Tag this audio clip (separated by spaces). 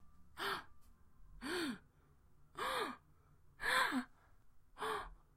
tension noise air gasping breath surprise surprised suspense gasp